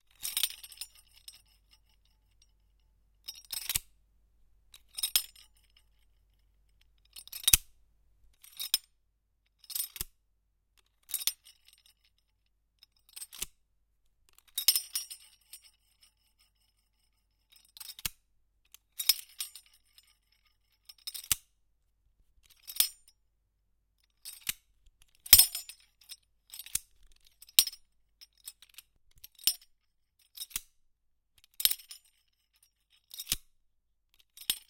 Opening and closing of a metal garlic crusher. Great for metal devices, latches, switches, gates.